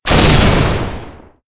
A simple shotgun sound